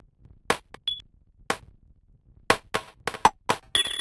Glitchy snares that I made using different VST effects.Loopable.